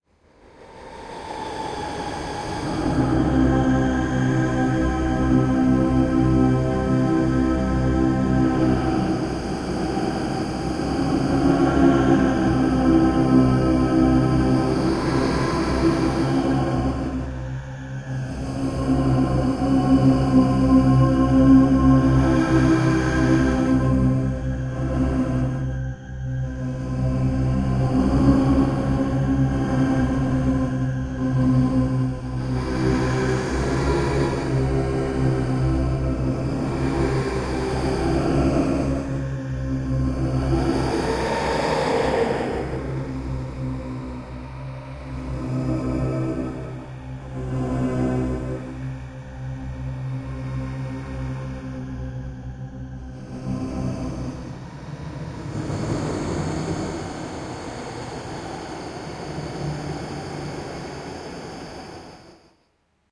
cw monk3
I made this from 10-12 different voices, stretch and reverb it. Just listen.
throat, tibet, vocal, sing, monk, synthesized, drone